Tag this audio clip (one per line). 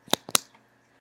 click
pen
sound
pop
clicks